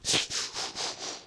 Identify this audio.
I did make this, not ripped from any games or show or anything. It's a combination of my breath and special effects. This is the sound that would play when a Transformer transforms into their alternate mode. Reversing the sound gives the opposite effect-or, "transforming out." This is more of a deeper fuller sound than my other.